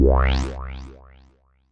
wah synth sound mad with Alsa Modular Synth
synth, wah